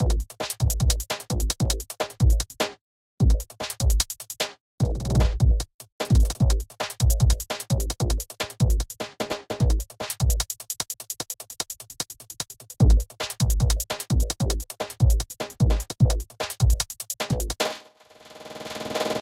Some bars of basic drums with a fat indistinct bass. Some random effects used to make it more unpredictable.
drumloop, drums, electro, irregular, loop, processed